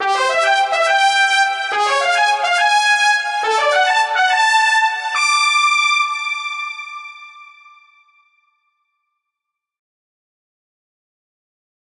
Hockey fanfare 1
The trumpet fanfare you hear in pretty much every hockey game.
engage, baseball, football, teaser, hockey, pleaser, sports, socker, crowd